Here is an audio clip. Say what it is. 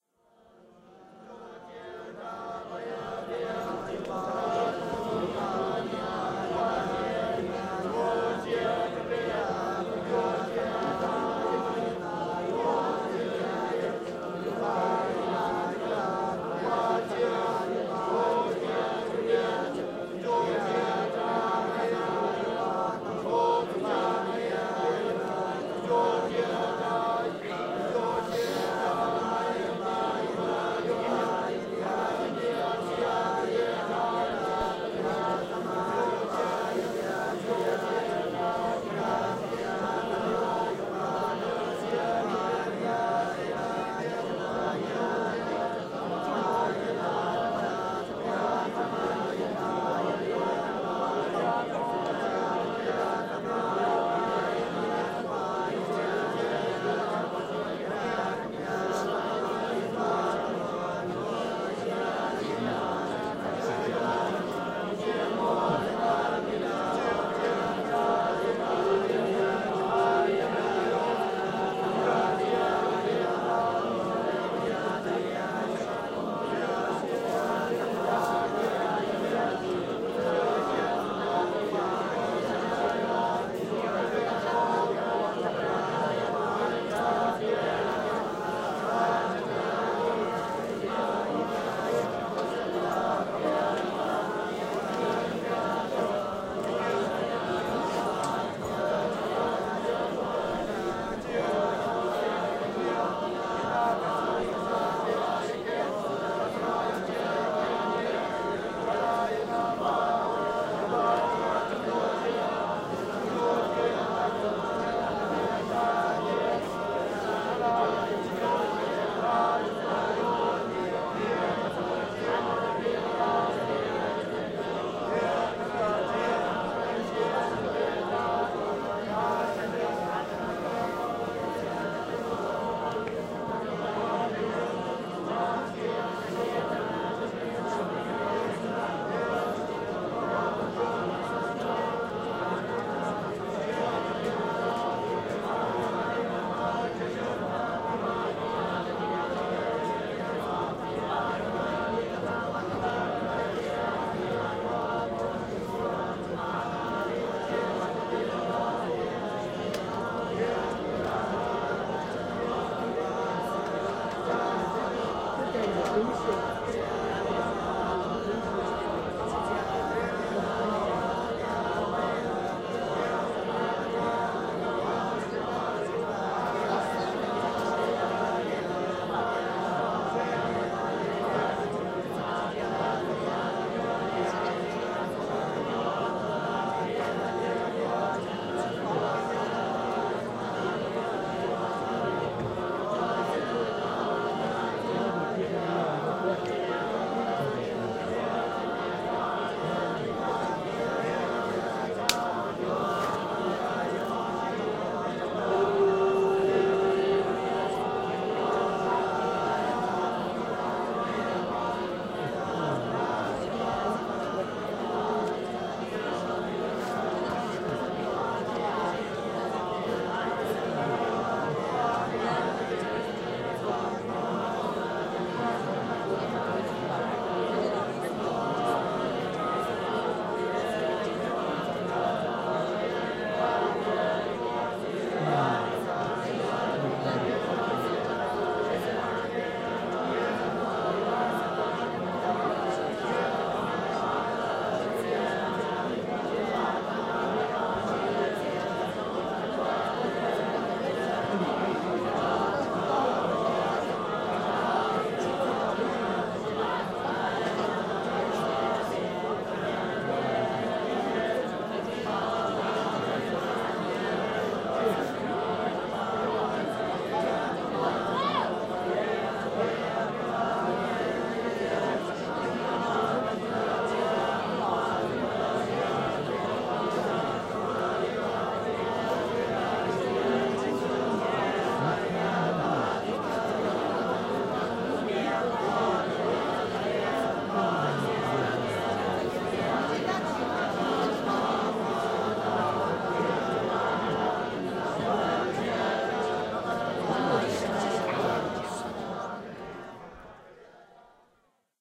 Buddhist Monks calling to prayer
At Labrang Monastery, in Xiahe, Northeast of Amdo province, in Tibet -after the Chinese occupation, the town, Xiahe, is now part of Gansu province-, at least 200 Buddhist monks make the call to prayer. You will hear around minute 1, and then after two more minutes, a particular howling. Because this is a ceremony open to the public, there are some voices around, mostly Mandarin speakers. Recorded in March 2017.
Asia, Buddha, Buddhism, Buddhist, Chant, China, Labrang, Monastery, monk, Monks, Religious, Tibet, Tibetan, Xiahe